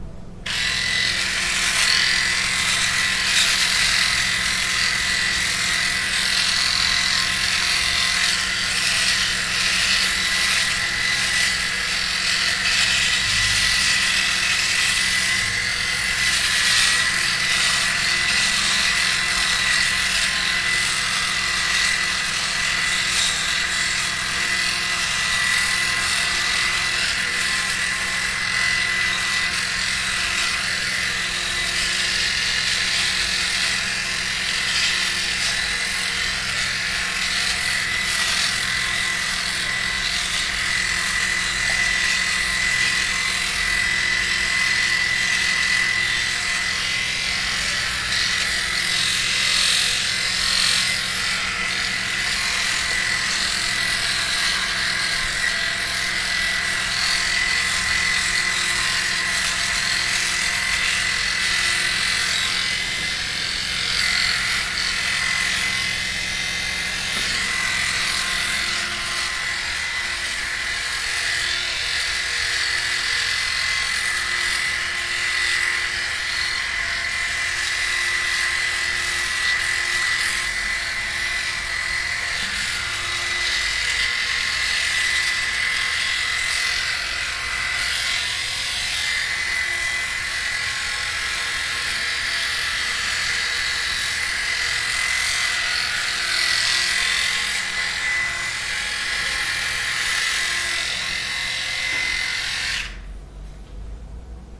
Electric shaver shaving
Shaving with electric razor. I was shaving and decided to record the sound of the electric shaver. Recorded with Zoom H1.
Fazendo a barba com barbeador elétrico. Eu estava me barbeando e decidi gravar o som do barbeador elétrico. Gravado com Zoom H1.